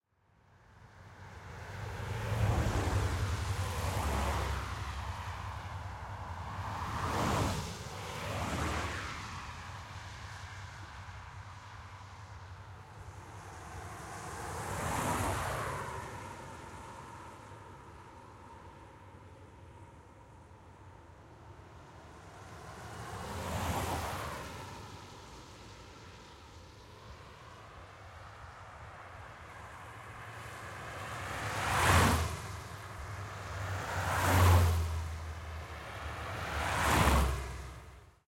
00 - Car across
Sound of cars on the road in suburb.
car, winter, tires, driveby